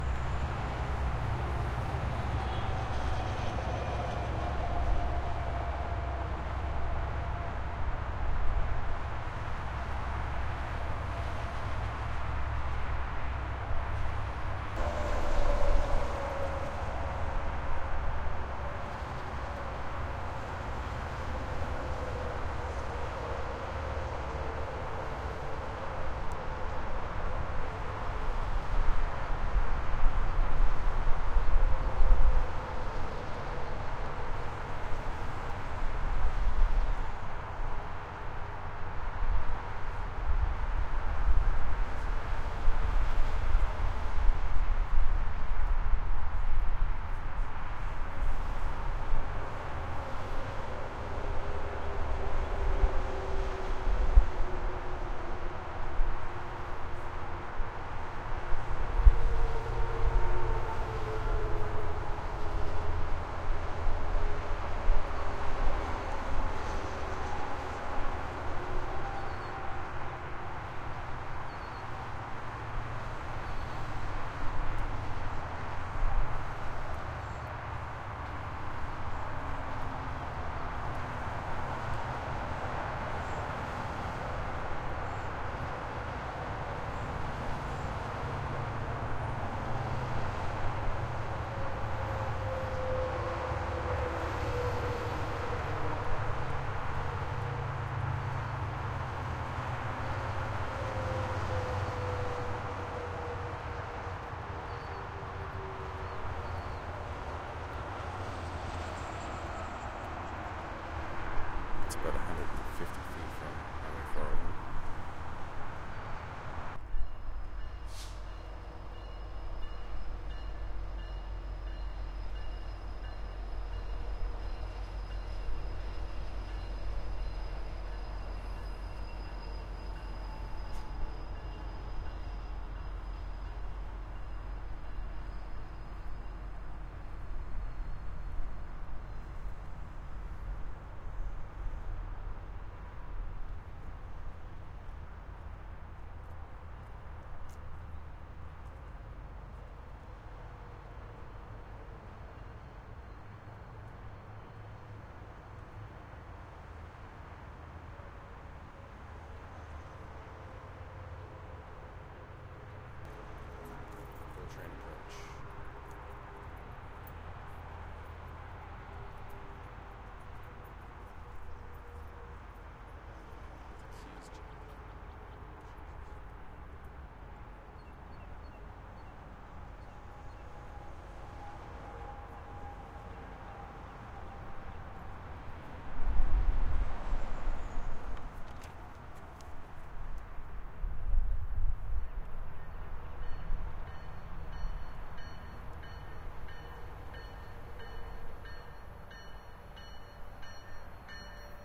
Distant Highway from Train Platform
platform, distant, highway, from